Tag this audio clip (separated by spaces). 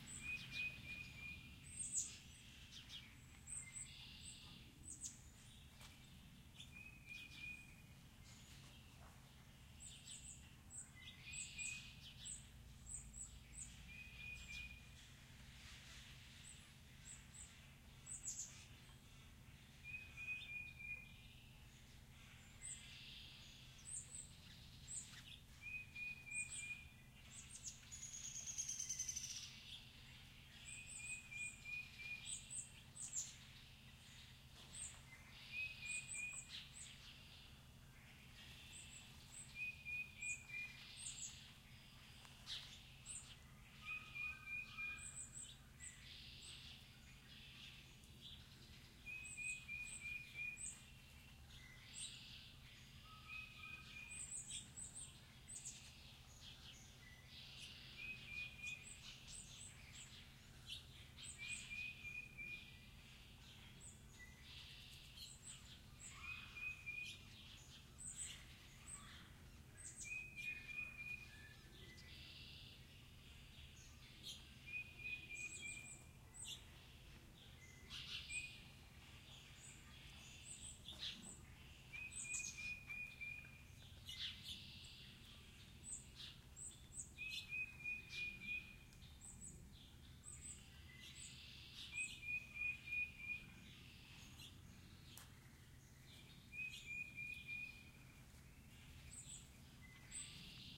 stereo field-recording Primo zoom ambience new-jersey array birds ambiance ambient atmosphere Spring